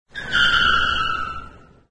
A car screeching to a halt on pavement
Original recording: "Tire Squeaking" by RutgerMuller, cc-0